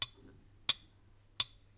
essen mysounds kian
germany, Essen, mysound, object